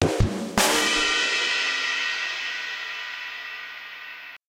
Ba Dumm Tss
UI sound effect. On an ongoing basis more will be added here
And I'll batch upload here every so often.
Ba, Dumm, SFX, Third-Octave, Tss, UI